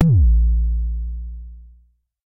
MBASE Kick 14
i recorded this with my edirol FA101.
not normalized
not compressed
just natural jomox sounds.
enjoy !
bassdrum, analog, jomox, kick